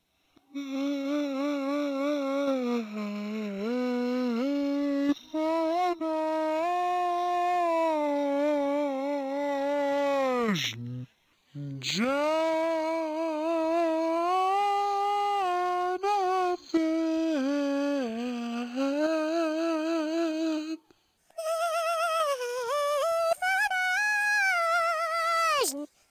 SingJonathan phonevoicemorpher Jan2012
A recording through my phone of myself singing my brother's name (Jonathan). The free app, VoiceMorpher, has a reverse option and you can morph the pitch of a recording up and down. These are three samples.